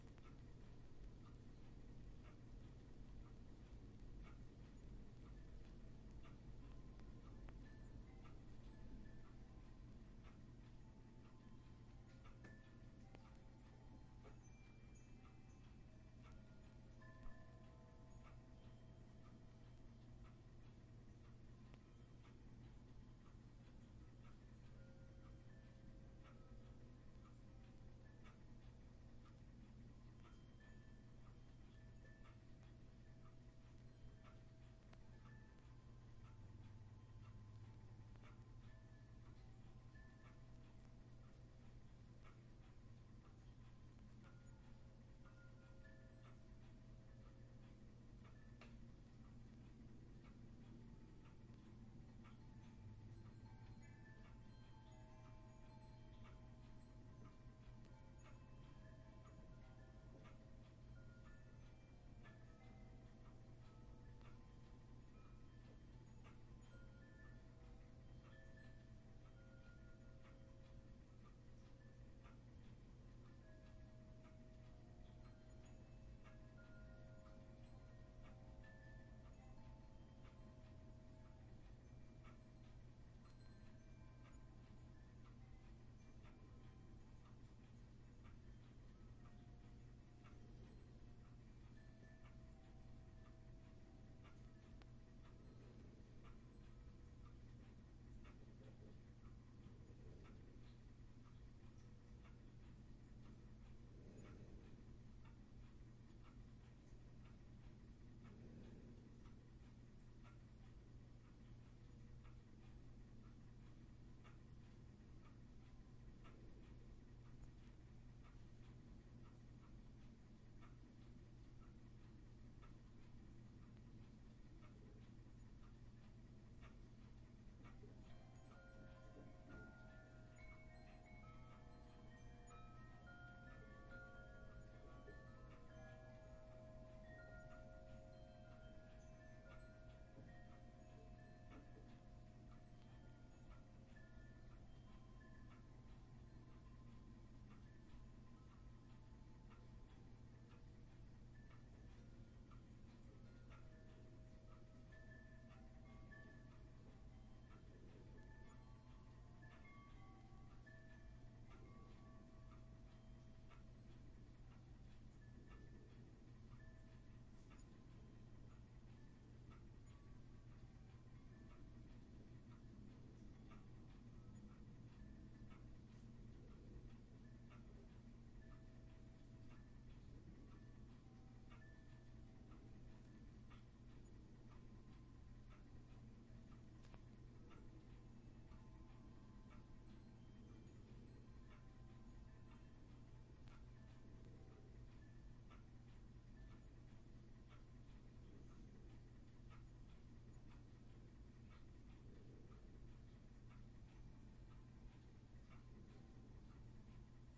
Ambience - Living Room with Clocks, Wind Chimes and Rainfall
Recorded in stereo with a Zoom H6. Heavy, consistent rainfall recorded from inside the still living room in a quiet suburban neighborhood. Some wind chimes from the exterior are blowing in the wind. Lots of ticking clocks and machine noises. This would be a great ambience for a fantasy audio drama - for the character of a clockmaker or forest witch.